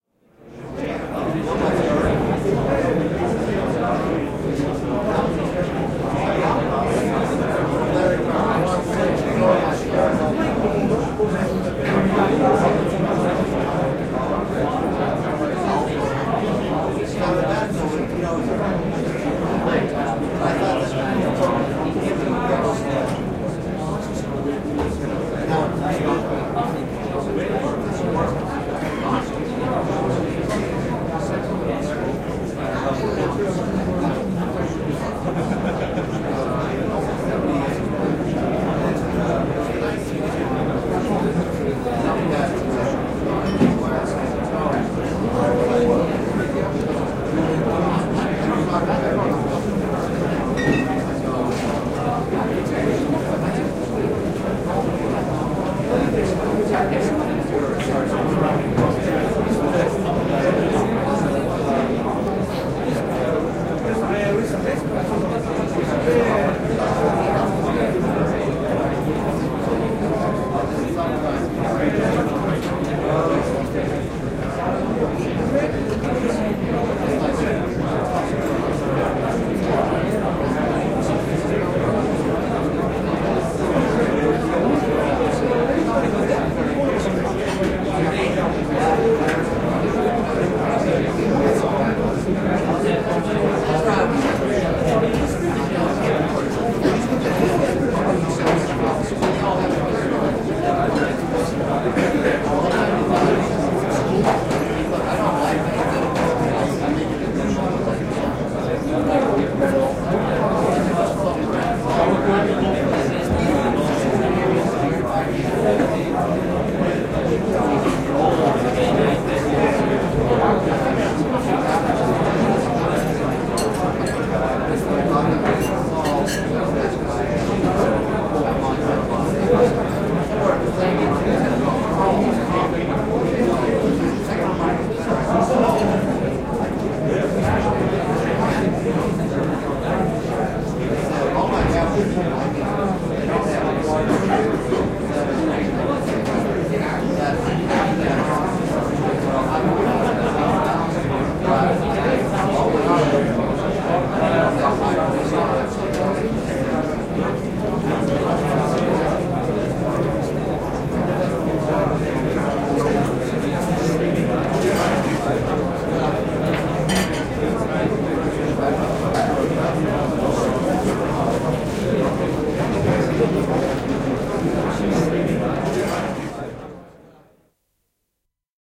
Ihmiset ravintolassa, ihmisjoukon sorinaa / Crowd, about 100 people, in a restaurant, multilingual talk, buzz of conversation, some clatter and jingle
Monikielistä sorinaa, n. 100 henkilöä, tasaista puheensorinaa, naurahduksia, vähän killinöitä.
Paikka/Place: Sveitsi / Switzerland / Montreux
Aika/Date: 06.03.1986
Talk, Restaurant, Sorina, People, Multilingual, Finnish-Broadcasting-Company, Ravintola, Buzz, Suomi, Ihmisjoukko, Field-Recording, Keskustelu, Ihmiset, Puheensorina, Yleisradio, Yle, Tehosteet, Crowd, Monikielinen, Hum, Soundfx, Finland, Conversation, Puhe